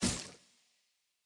Hit Swing Sword Small
blood-hit, compact, game, game-fx, metal, swing, sword